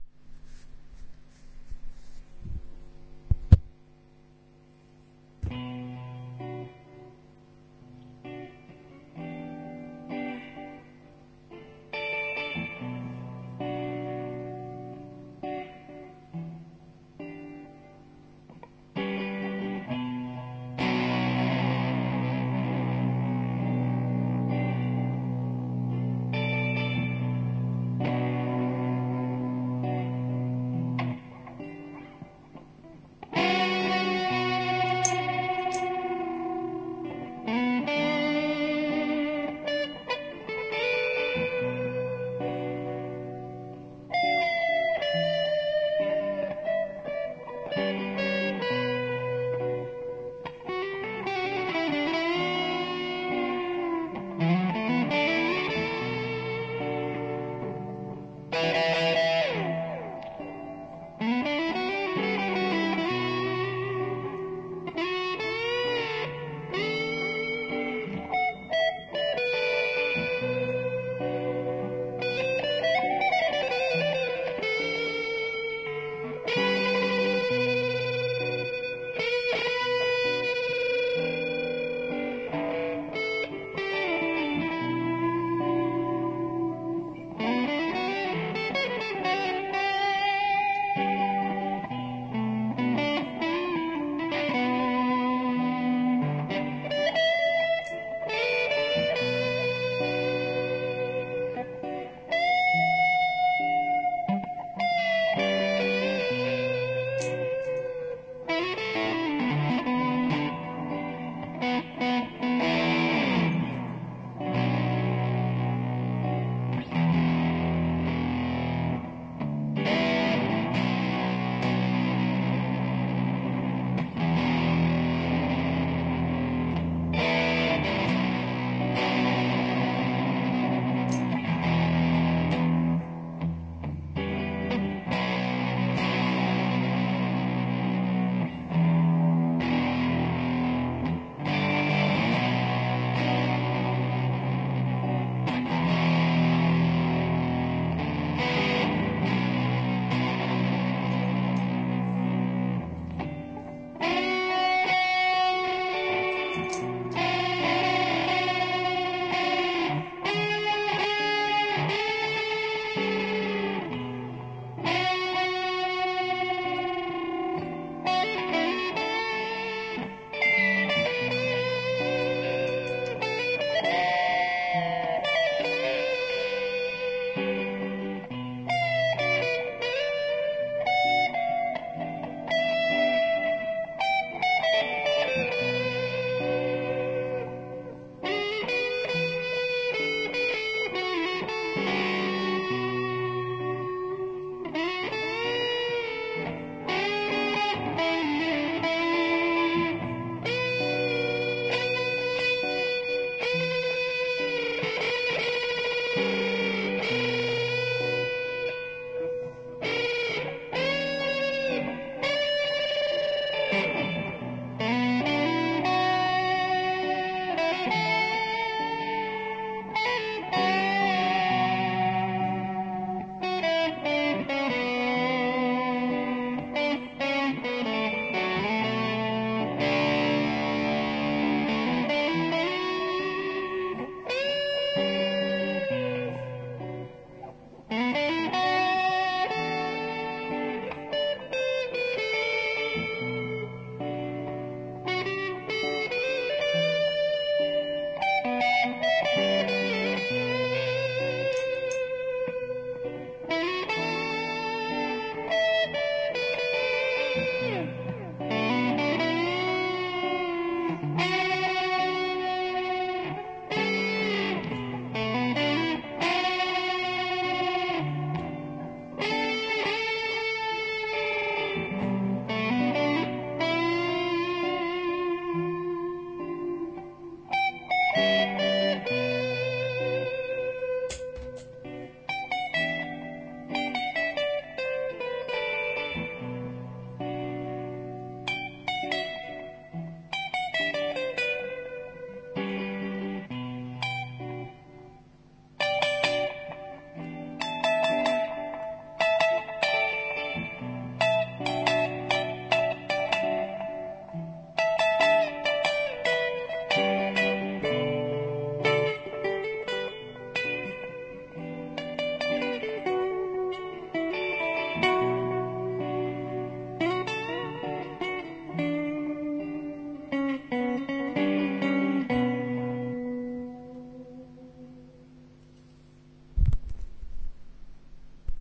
Atmosphere improvisation in Bm recorded on the recorder